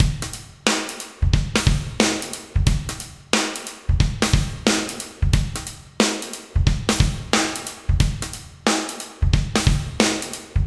Funk Shuffle D
Funk Shuffle 90BPM